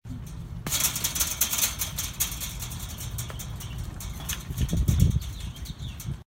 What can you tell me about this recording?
shaking chain link fence vibration
The shaking of a chain link, metal fence.
chain, chain-link, chainlink, clinking, metal, metallic, motion, rattle, rattling, shake, shaking, vibration